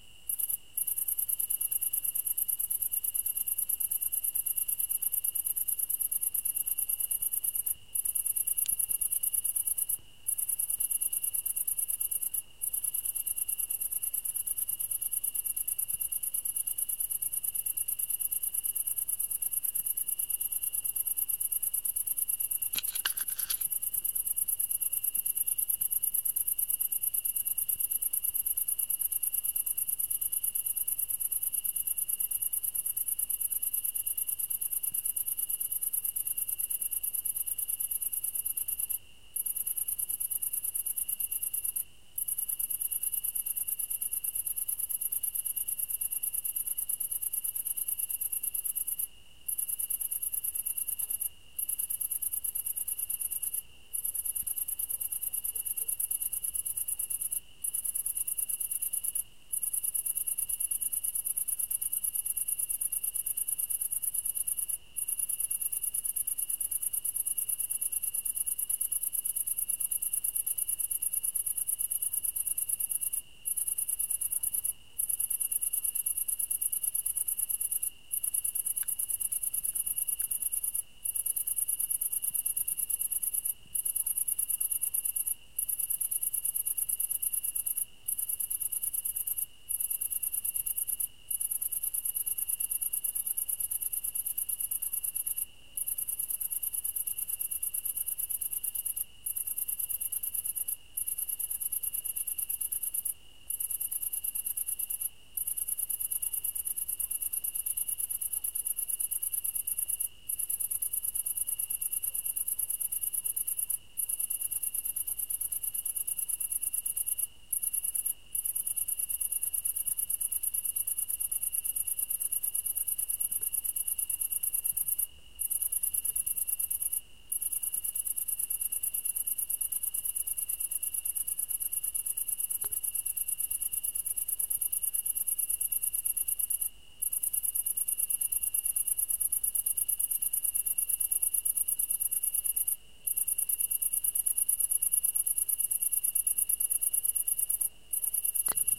After sunset I hanged on my MP3 player on the branch of a tree and recorded the ambiance. File recorded in Kulcs (village near Dunaújváros), Hungary.
ambient, cricket, field-recording, horror, nature, night, thriller